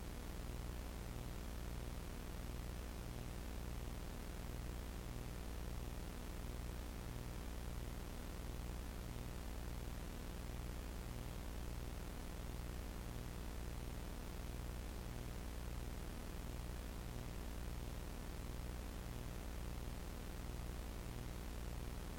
VHS VCR hum crunchy
VHS Hum made in audacity when playing around with frequencies
vhs, vcr, tape, 80s